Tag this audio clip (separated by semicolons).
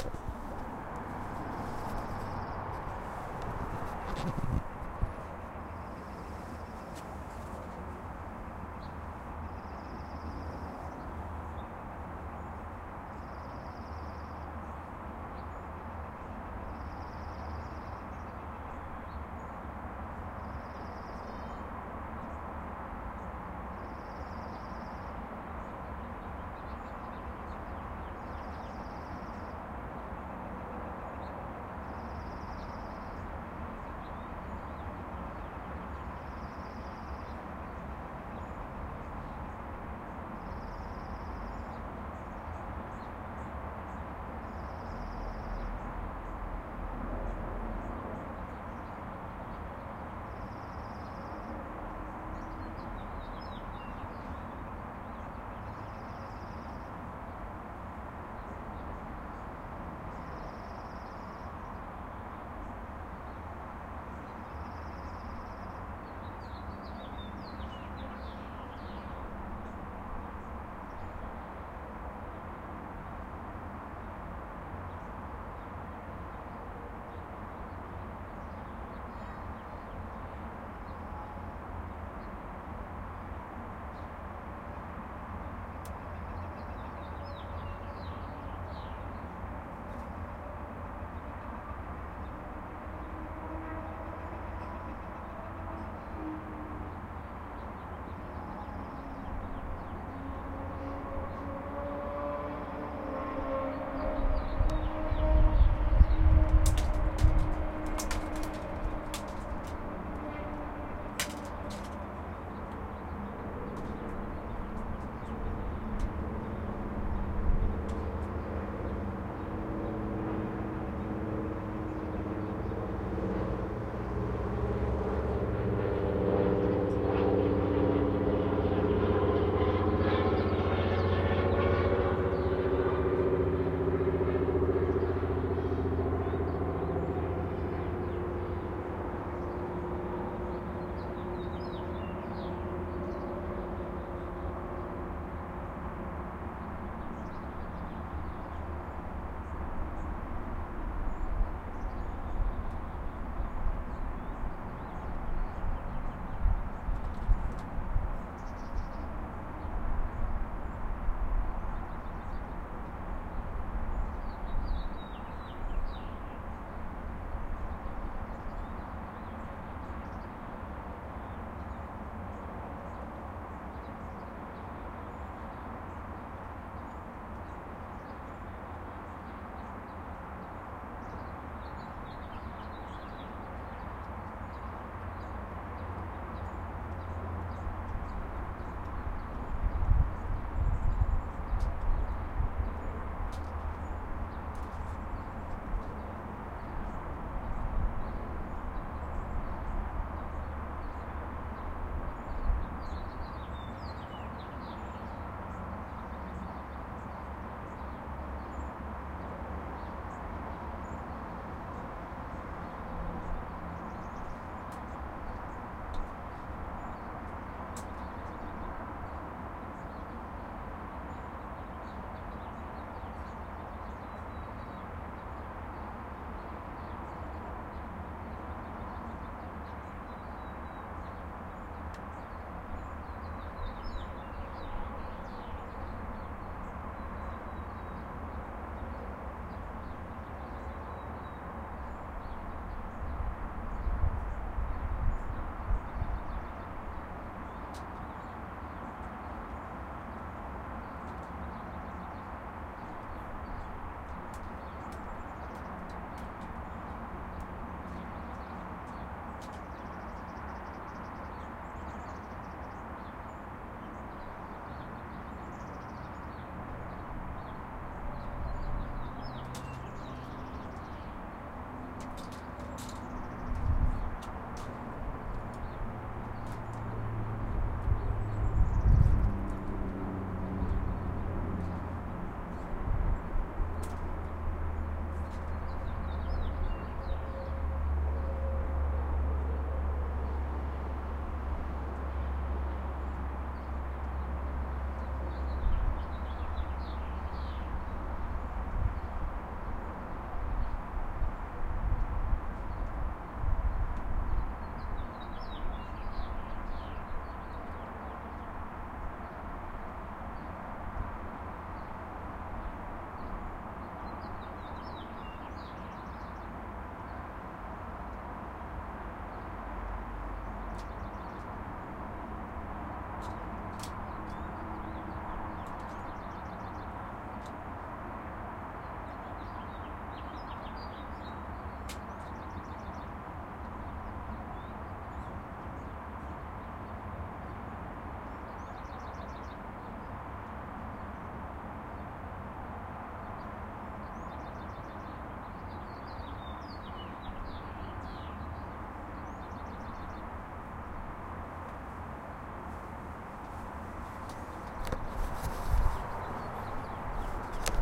birds; small-plane; ambiance; urban; planes; atmosphere; field-recording; sound; cars; plane; environment; insects